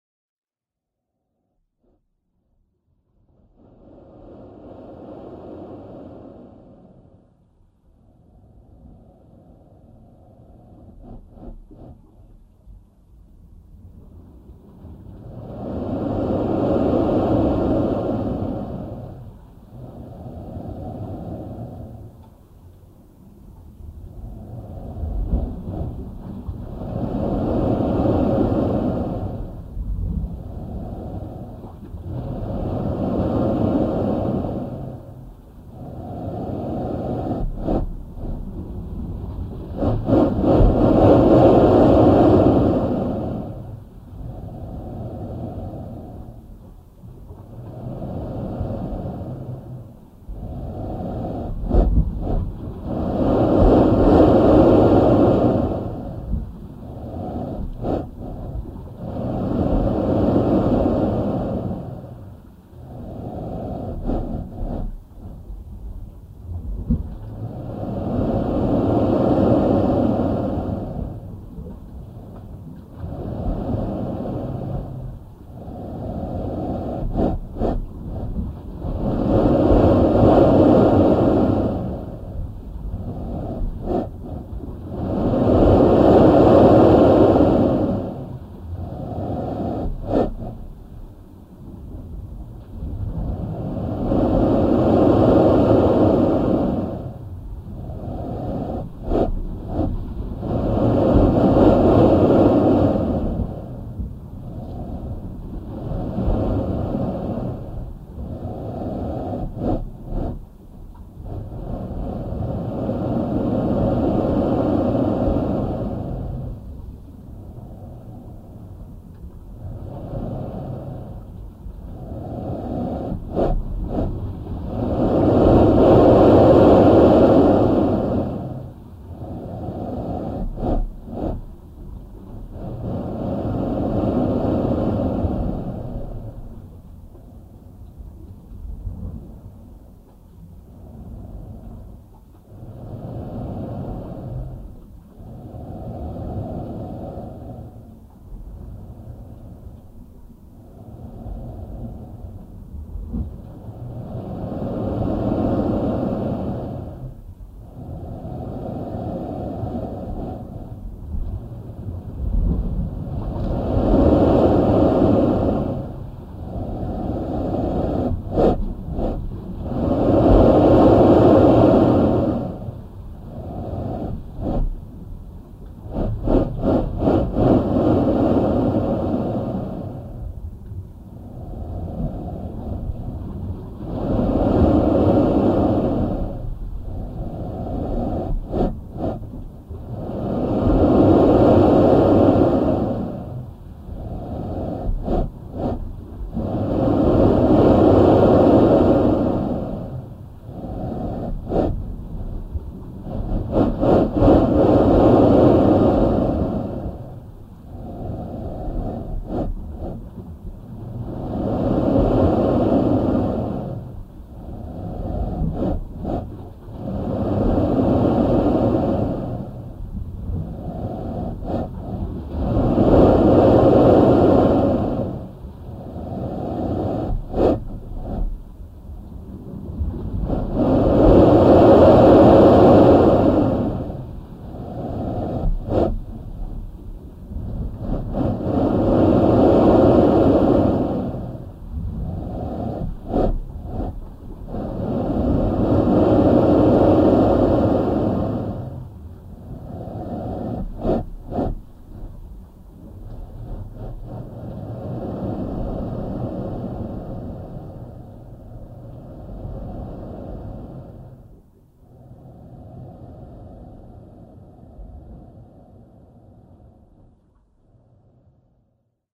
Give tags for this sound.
la-bufadora; punta-arenas

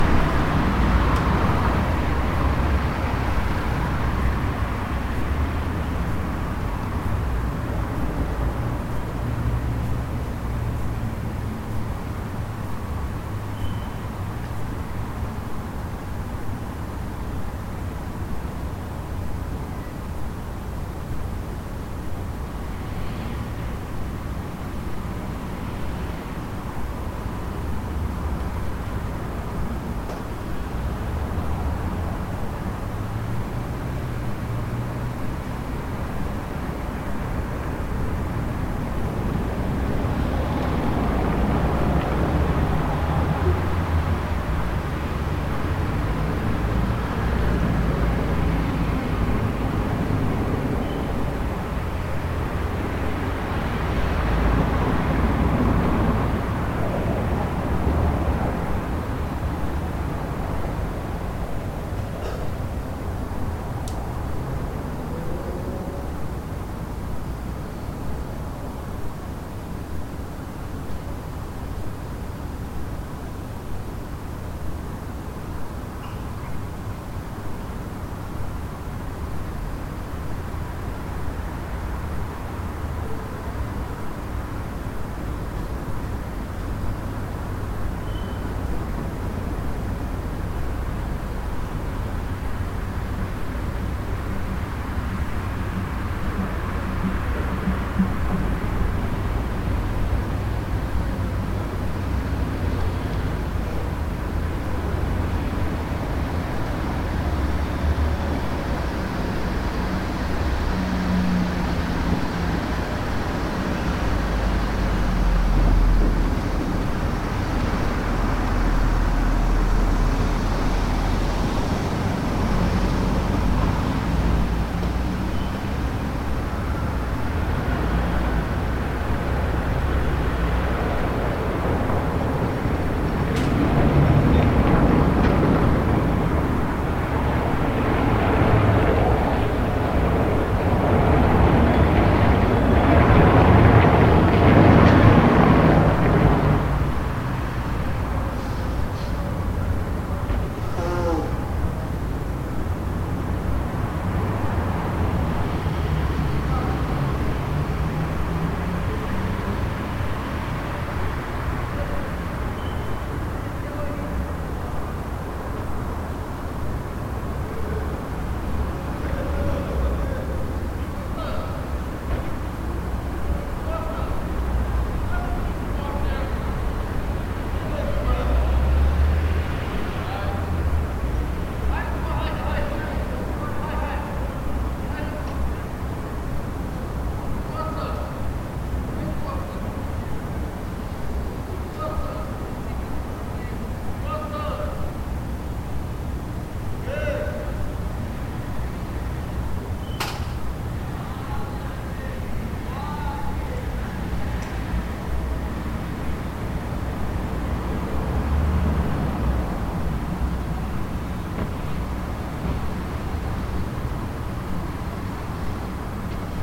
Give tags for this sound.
street; ambiance; brussels; ambiant; ambient; city; ambience; belgium

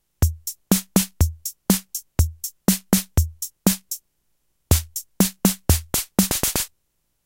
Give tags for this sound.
SK-86; Czechkeys; Piano; Keyboard; Organ; Vintage; Vermona

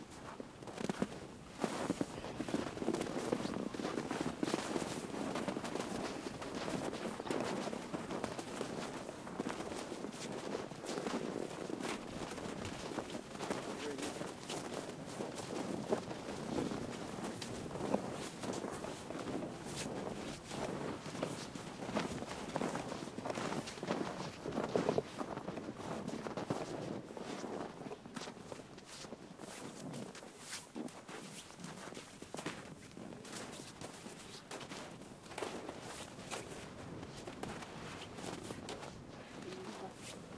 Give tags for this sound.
boots; footsteps; group; snow; soft

